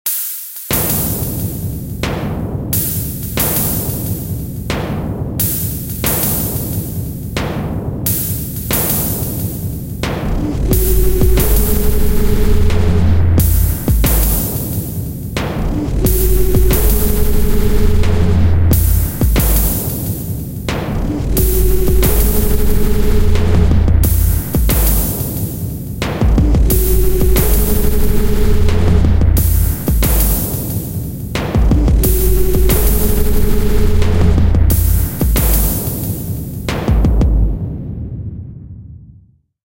Industrial creepy beat